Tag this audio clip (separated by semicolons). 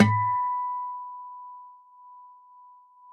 multisample; guitar; 1-shot; velocity; acoustic